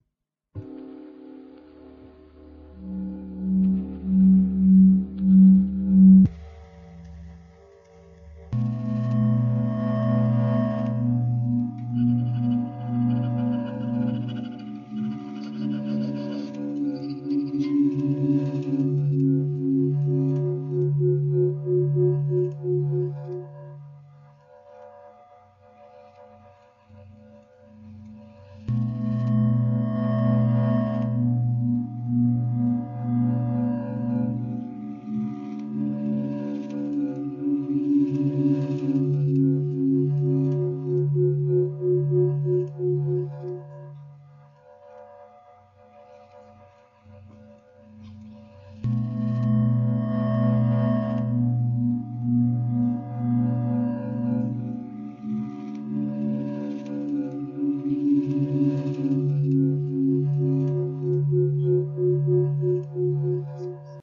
playing with a box micro contact
home, made, instrument, ambient